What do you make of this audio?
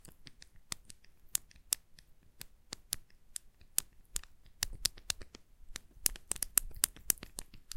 Lock 2 - Shackle
Struggle with lock shackle
close, closing, door, gate, key, keys, lock, locking, locks, open, opening, padlock, pick, picking, shut, unlock, unlocking